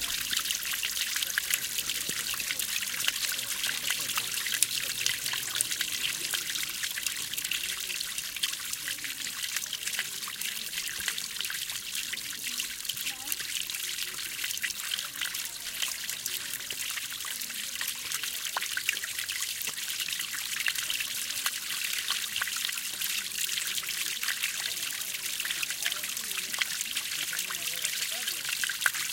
water splashing, very bright. Recorded at El Generalife gardens, Granada, S Spain. Soundman OKM mics, FEL preamp and Edirol R09 recorder